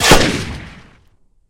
One of 10 layered gunshots in this pack.